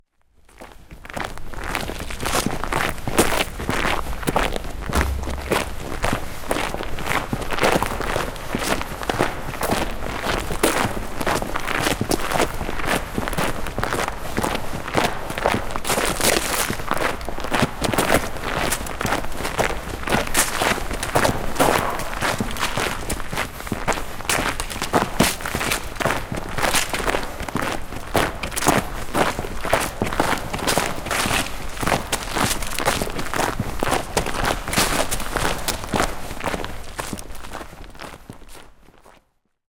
Footsteps on the gravel in the tunnel
Tunel, Walking